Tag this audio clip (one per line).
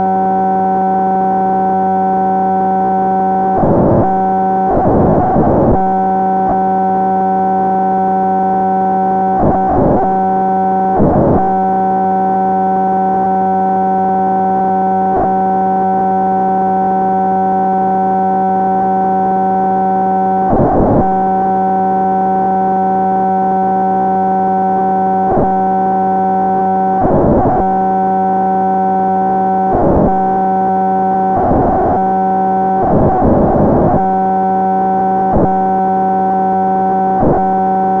VST
x-modulation
virtual-modular
cross-modulation
kamiooka